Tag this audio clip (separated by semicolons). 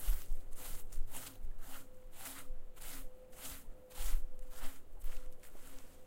sweep; nature; shaker